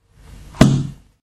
Closing a 64 years old book, hard covered and filled with a very thin kind of paper.